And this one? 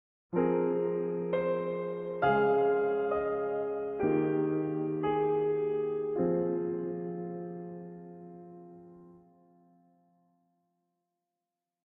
A small lyrical phrase expressing loss.
contemplation, good-bye, sadness, sorrow